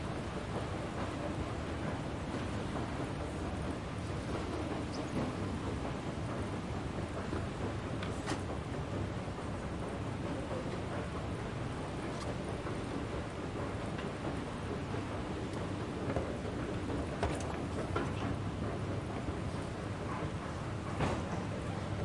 Sonicsnaps-OM-FR-escalateur metro
The escalator in the Paris metro.